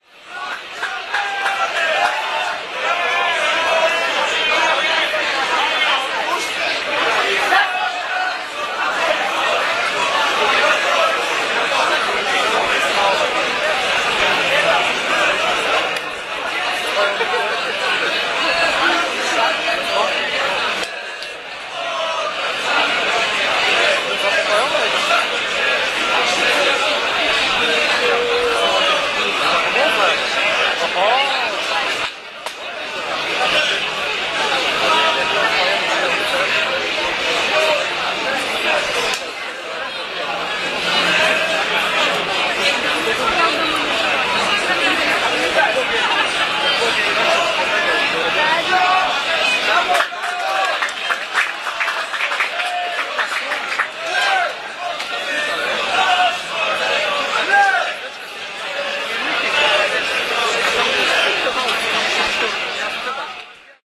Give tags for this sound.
field-recording; hubbub; match; noise; poeple; poland; poznan; soccer; square; voices

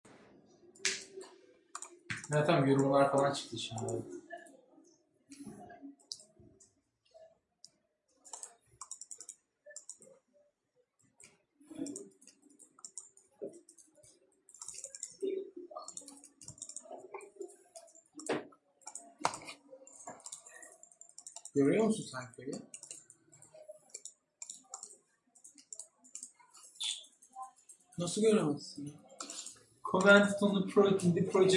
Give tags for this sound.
design; factory; office

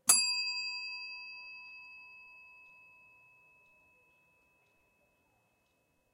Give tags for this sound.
accessoires; bell; hotelbel; hotelbell; indoor-field-recording

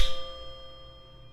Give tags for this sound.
balls; baoding; chinese; metallic; percussion; short

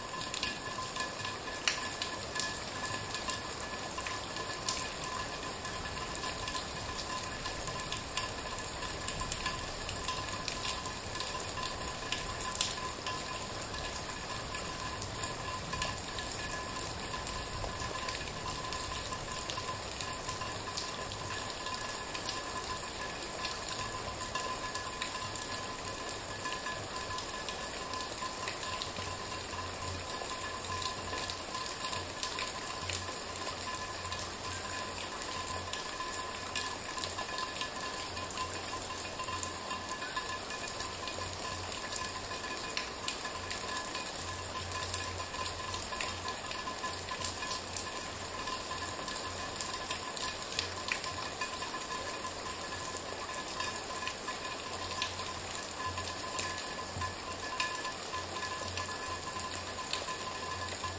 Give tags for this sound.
Rain; hard; heavy; harmonics

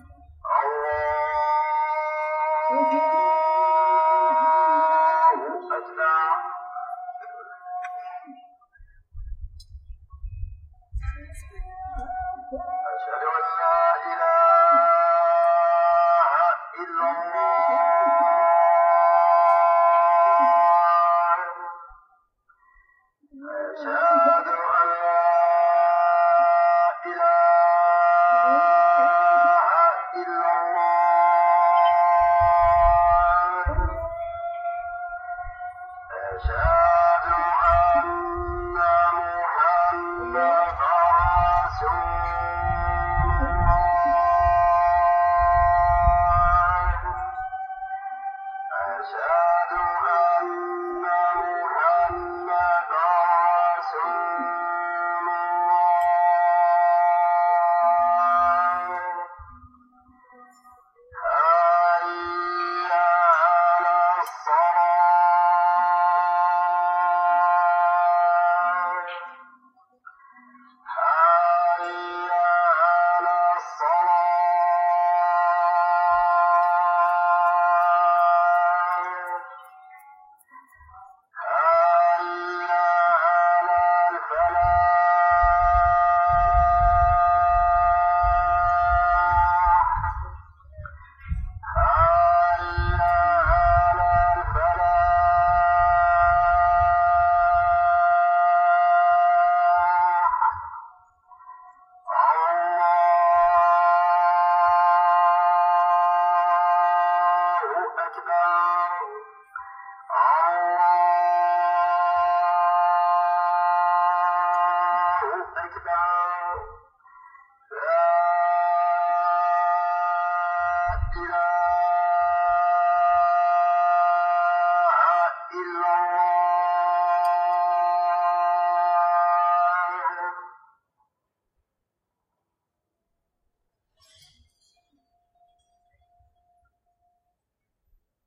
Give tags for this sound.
Africa; Call; Field-recording

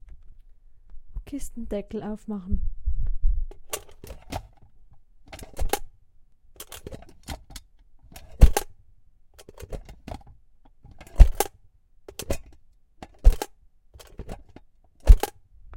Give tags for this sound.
close; metal; open

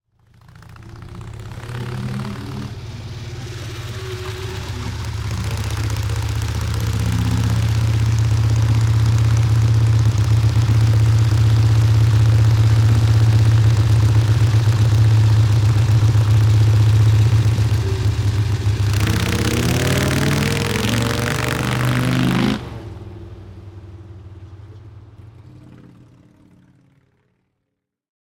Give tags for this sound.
Motorcycle; Belgium; 1974; Motorbike; Harley-Davidson; XLCH

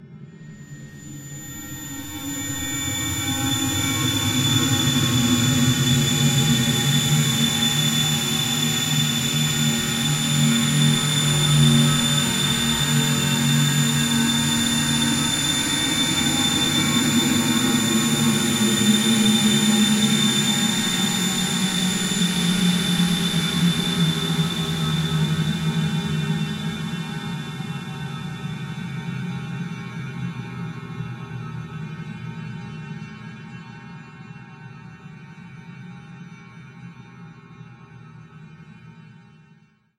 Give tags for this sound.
cinimatic; soundscape; space

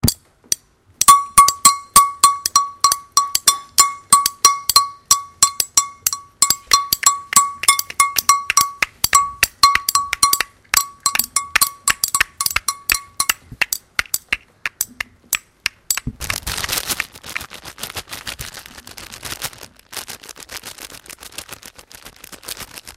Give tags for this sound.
France; messac; soundscape

